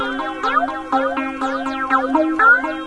hf-7311 Tranceform!
I think this is a pretty good loop. It's aggressively strange and undeniable peculiar.
chill, chillout, electro, electronica, loop, trance, ts-404